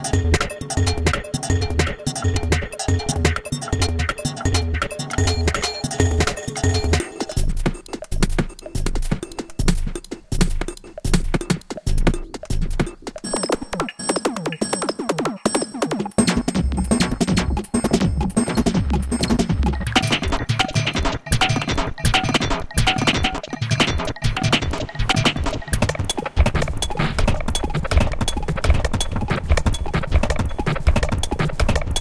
This loop has been created using the program Live included Ableton 5and krypt electronic sequencer drums plug in in the packet of reaktorelectronic instrument 2 xt